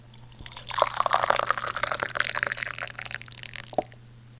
Tea being poured into a mug